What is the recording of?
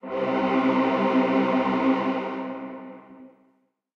120 Concerta male choir 01
layer of male choir